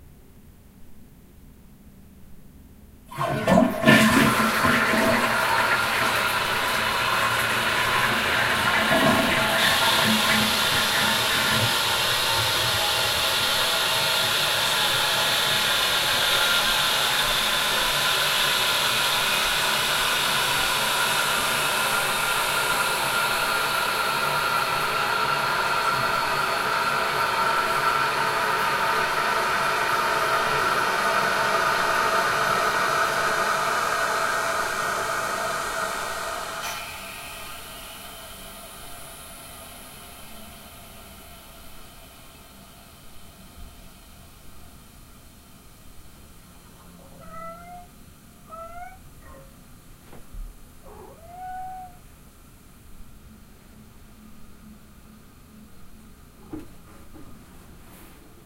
Recorded in my small apartment bathroom because I just need to start recording stuff to get my sound library going. Recorded with my Zoom H4n using its built-in condenser mics at a 90 degree XY coincident pattern. Bonus cat meows toward the end (Translated as "Daddy, what are you doing? Why are you in the bathroom with the door cracked??")
flush, flushing, meow, bathroom, cat, plumbing, toilet, water